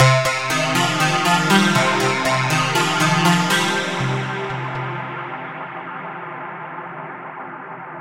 A very basic rhythm made in Ableton
dance, electronic